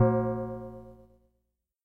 Nord Drum TOM 5
Nord Drum mono 16 bits TOM_5
Drum, Nord